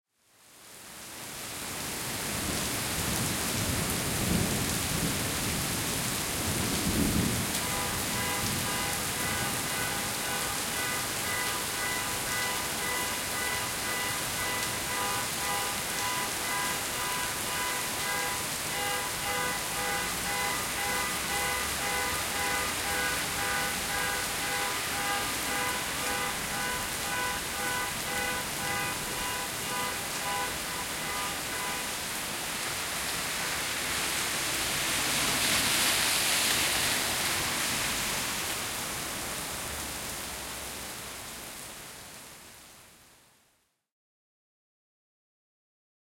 Car alarm system during rainfall in Montreal
Zoom H4N Pro
rainfall, rain, thunderstorm, shower, lightning, nature, thunder, storm, system, alarm, car, weather